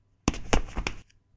This sound could be described as a stumble or a trip. Made with sneakers on a tile floor. Recorded with a Turtle Beach P11 headset and processed through Audacity.